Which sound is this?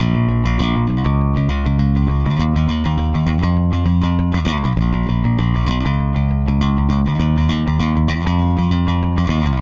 Rock BassLine Bbm
Ableton-Bass, Bass, Bass-Groove, Bass-Loop, Bass-Recording, Bass-Sample, Bass-Samples, Beat, Compressor, Drums, Fender-Jazz-Bass, Fender-PBass, Funk, Funk-Bass, Funky-Bass-Loop, Groove, Hip-Hop, Jazz-Bass, Logic-Loop, Loop-Bass, New-Bass, Soul, Synth, Synth-Bass, Synth-Loop